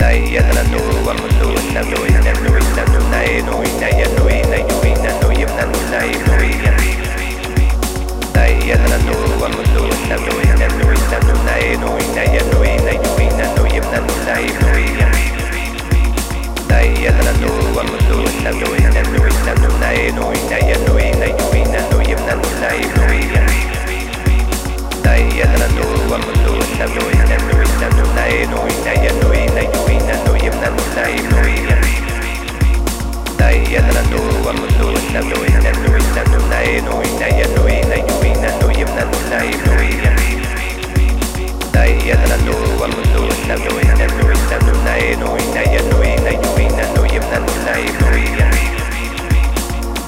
vocal perc outro music
Inspired by the sound of the day sample and with many thanks, found here: